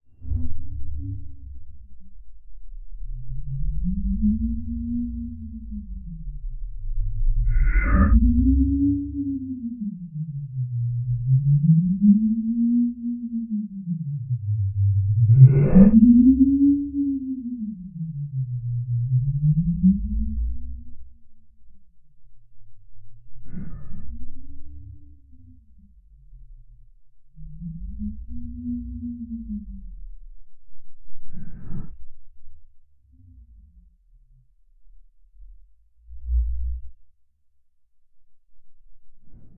Weird wobbling synth noise
creepy, experimental, noise, odd, scary, sinister, strange, synth, voice, weird, wobbling